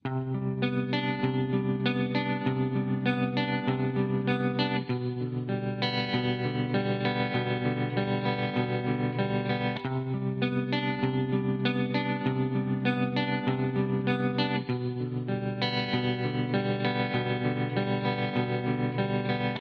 indie rockin' 2.1
Indie rock guitar with tremolo